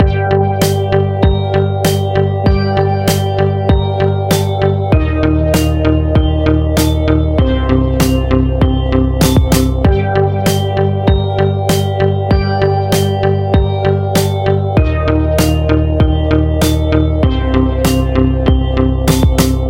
80s retrowave loop made in FL Studio.
80s, adventure, background, bass, beat, bell, cinematic, drama, dramatic, drum, drums, film, game, hope, intro, loop, movie, music, retro, retrowave, series, snare, soundtrack, synth, television, theme, video